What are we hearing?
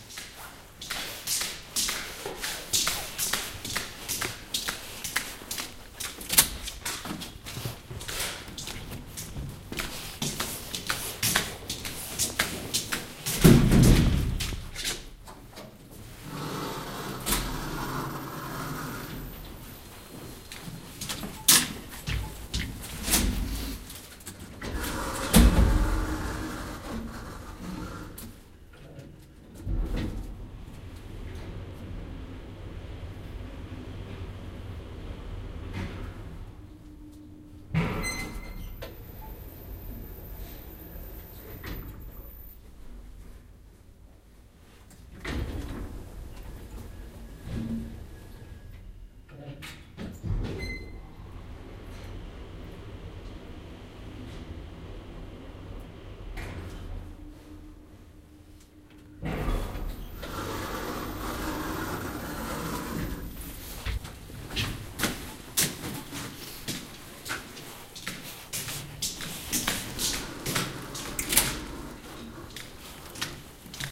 hissi 02 - elevator 02 - entering, door closes, elevator moves
Hissin sisätila, hissiin meno, hissi liikkuu, ovi aukeaa ja sulkeutuu - äänitetty zoom h2n and editoitu audacity - elevator - entering into eleator, door closes and opens, elevator moves, recorded with zoom h2n and edited with audacity, location: Finland- Riihimaki date: 2014
closes, door, driving, elevator, field-recording, interior, opens, stops